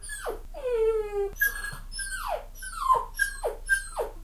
This was shortened (to about 4 seconds long) and edited in an attempt to make it sound more like a younger pup.
pup, whine, animal, puppy, cry, whimper, yelp